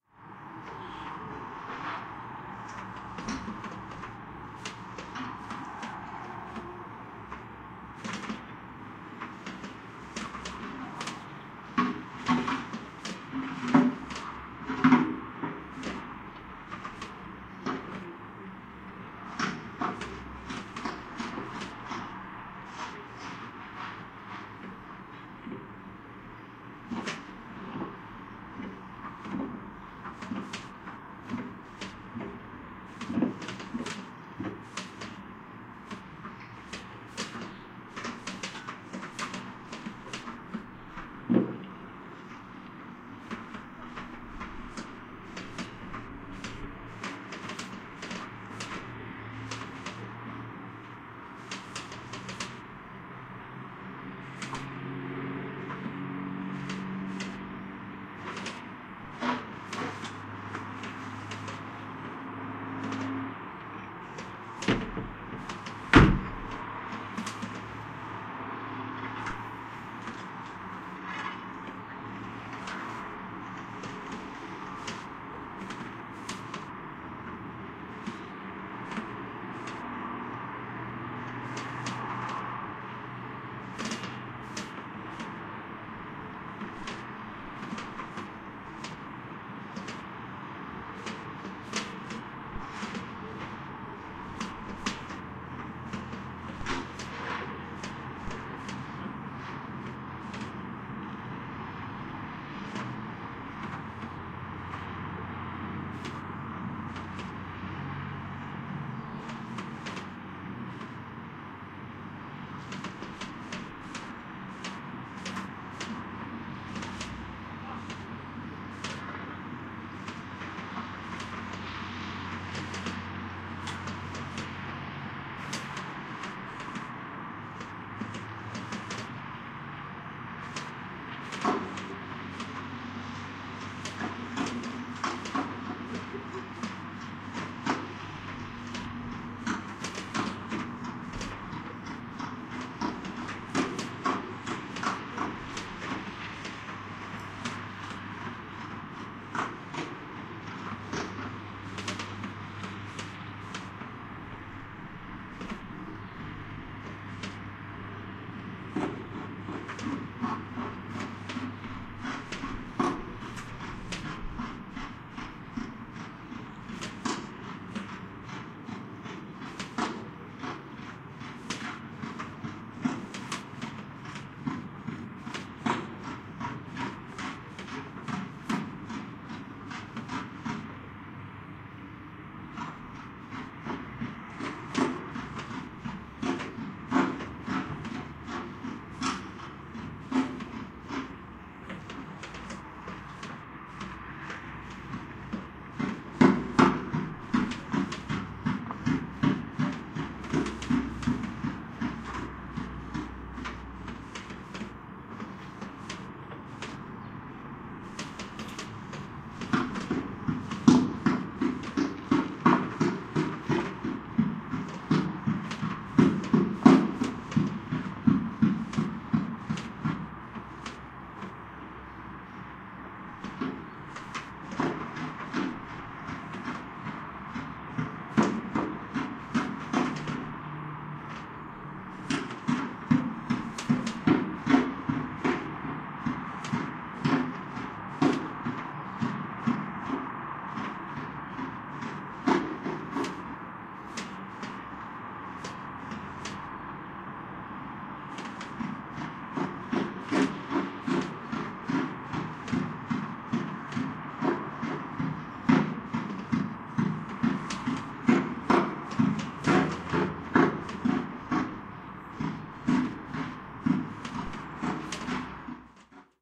winter snow frost
winter, frost, snow